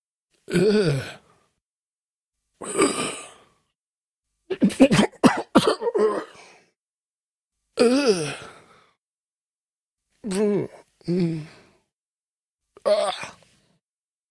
AS016231 Disdain Disgust
voice of user AS016231
disdain, disgust, human, male, man, vocal, voice